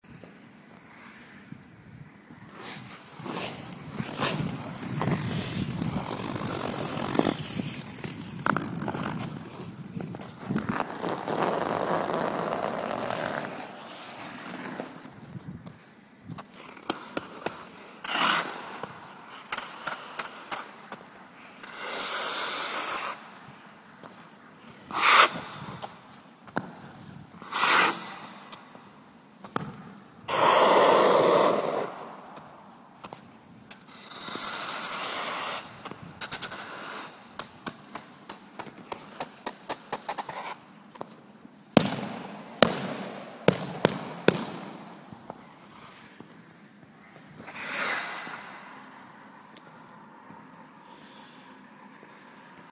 skate fx
Just some random skate sounds made with different parts of the blade. some deep edges, scrapes, scratches, skids, toe picks, stamps etc...
solo skating in an empty rink
figure-skating, ice, ice-skating, winter